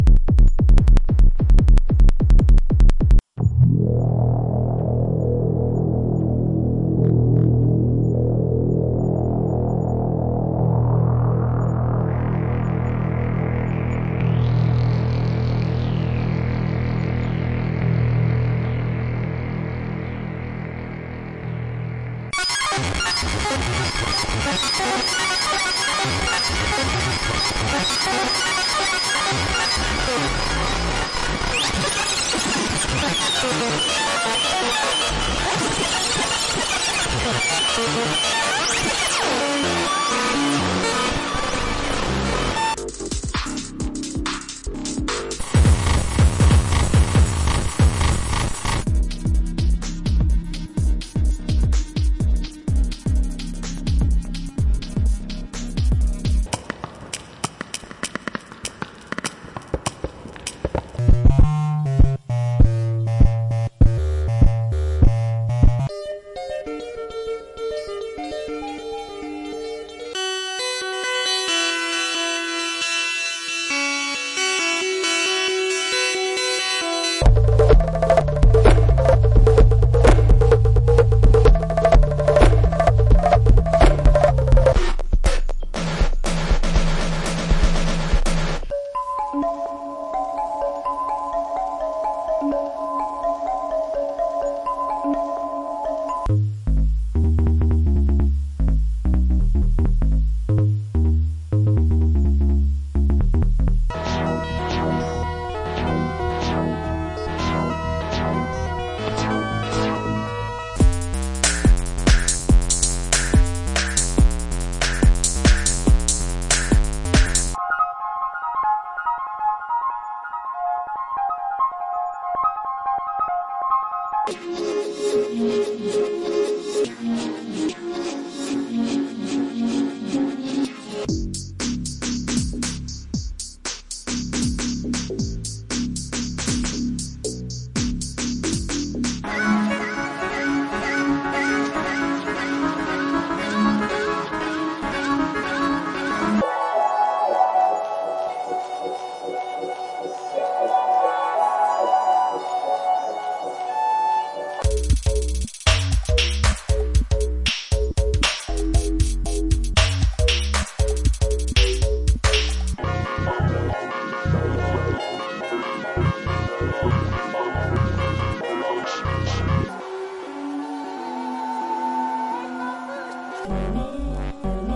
Darion Bradley Nov 9 2017 Reel
Formatted for use in the Make Noise Morphagene.
Beats, loops and textures made by Black Box Theory aka Darion Bradley of the Make Noise crew. Plays in left channel for easy use with "Time Travel Simulation" techniques. Created with Elektron Digitakt, Make Noise 0-Coast, and vinyl.
0coast, beats, black-box-theory, blackboxtheory, darion-bradley, digitakt, elektron, loops, make-noise, makenoise, mgreel, morphagene, textures, vinyl